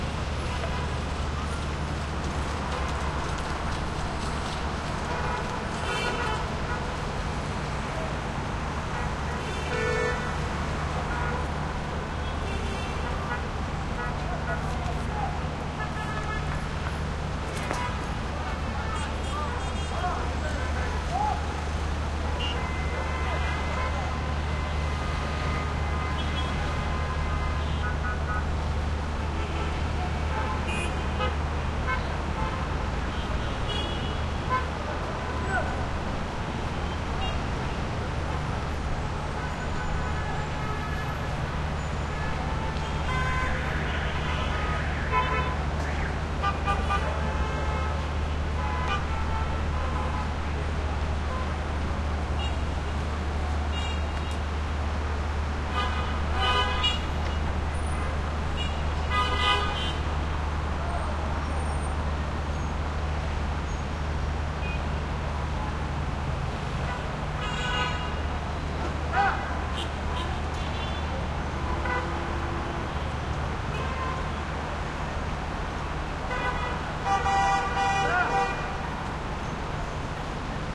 traffic medium Middle East skyline balcony haze from roof 4th floor throaty gridlock traffic jam horn honks Gaza 2016
skyline, balcony, East, medium, traffic, Middle, roof, haze, city